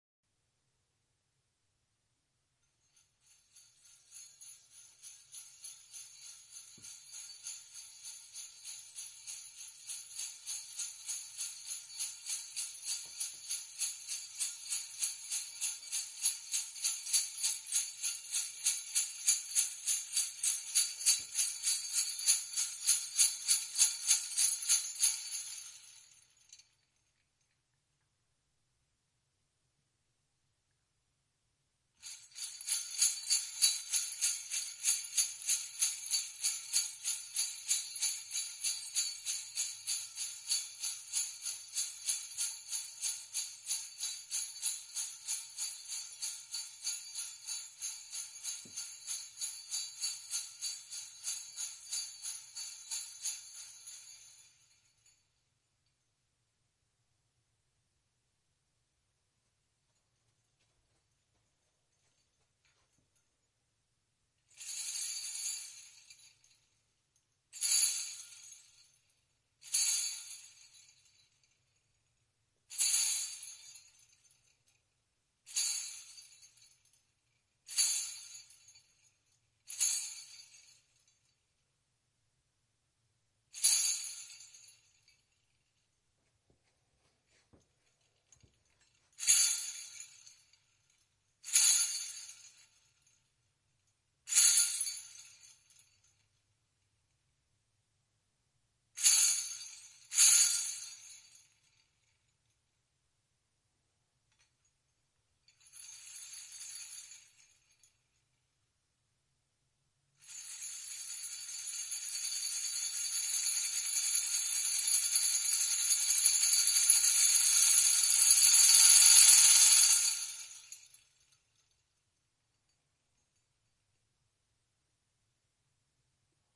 Little Bells
Bells, Folk-music, Indian-dance